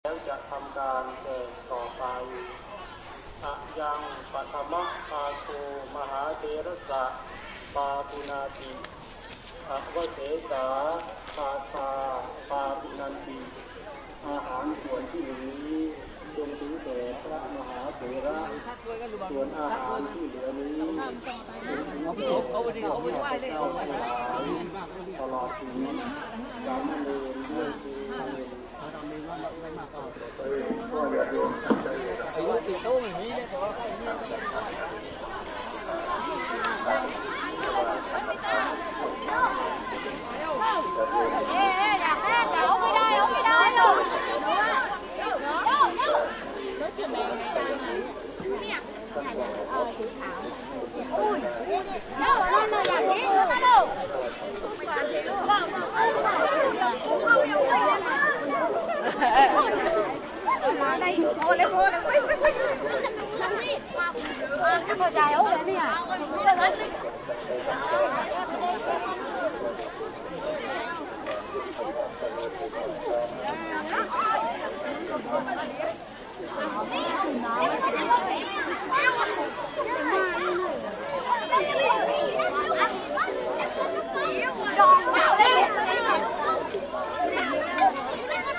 "parents day" feast at the southern Thai monastery Suan Mokkhapalaram.
They were recorded using a Sony stereo lapel mic on a hacked iRiver H400 running Rockbox.
buddhist, field-recording